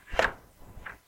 Door Open
open, opening